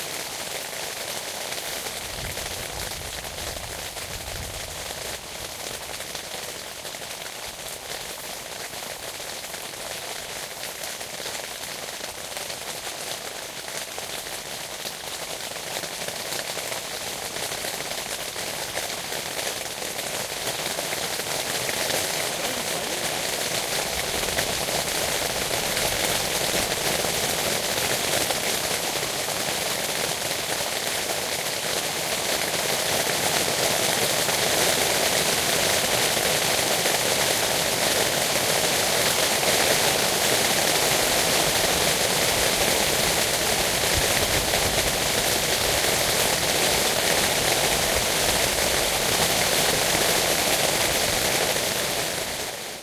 Heavy Rain In Tent Catherdral Ranges
Heavy Rain recorded from inside a Tent in the Catherdral Ranges, Victoria, Australia.
Recorded with a Zoom H2N